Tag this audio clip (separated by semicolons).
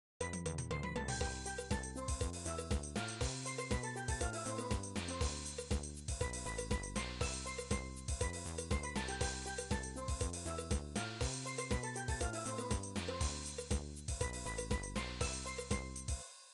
loopable; music; happy; drum; steel